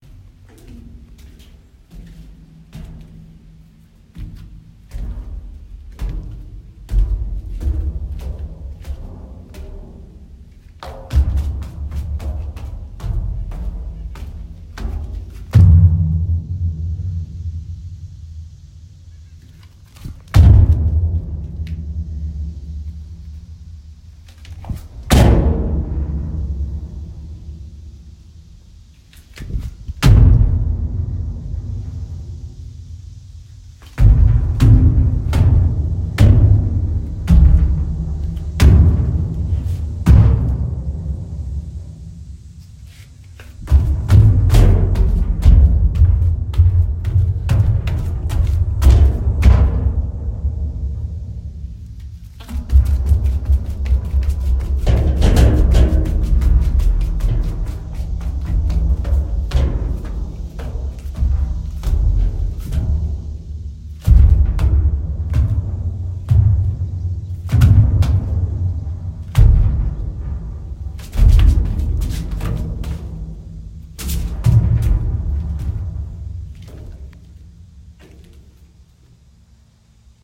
jumping in empty dumpster sounds metallic echoes
I jumped inside an empty dumpster because it sounded cool. I hope you agree!